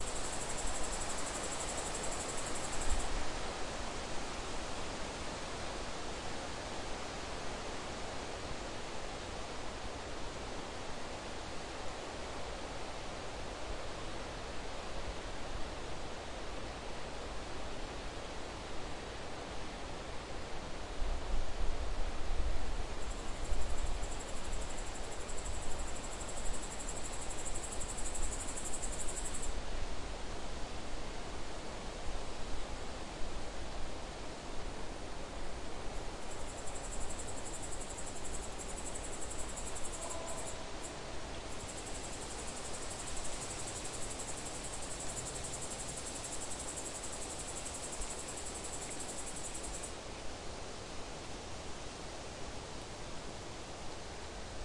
Early autumn forest. Noise. Wind in the trees. Insects.
Recorded: 2013-09-15.
XY-stereo.
Recorder: Tascam DR-40
ambiance, ambience, ambient, atmosphere, field-recording, forest, nature, noise, soundscape, trees, wind